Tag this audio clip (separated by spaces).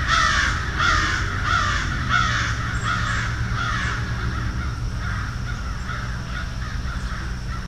CAW NATURE